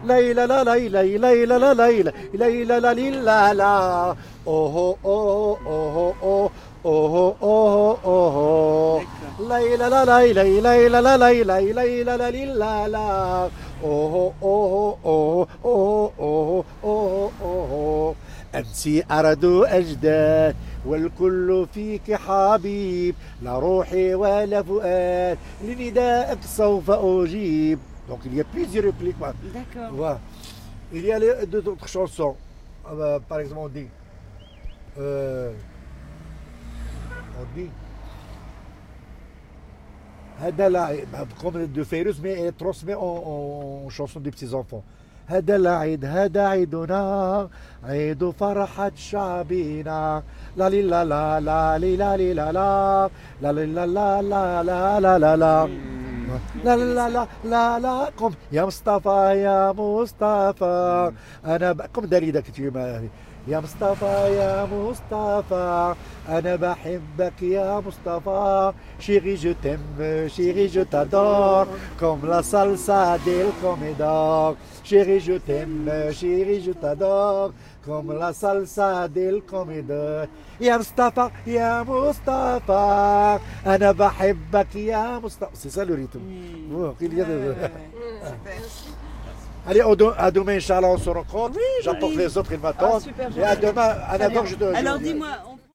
Fez-singing in the car
Local musician singing in his car while driving us back to the hotel.
Zoom H2
16 bit / 44.100 kHz (stereo)
Fez, Morocco - february 2010
Fez, field-recording, spokenwords, malevoice, Morocco, street, song, traffic, French, Moroccan